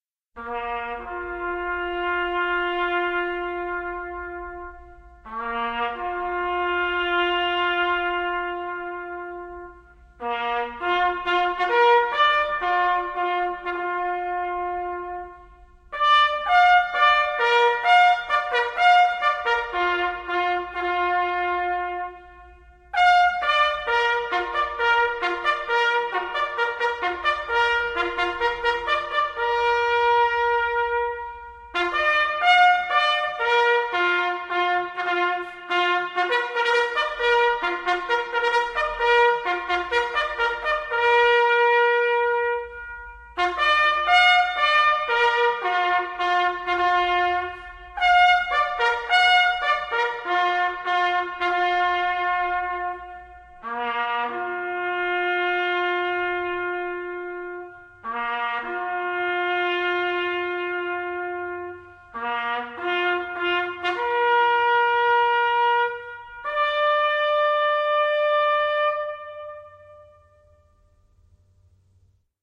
Last Post 2
bugle, bugle-call, bugler, ceremony, commemoration, funeral, last-post, military, stereo, ultimate-sacrifice, xy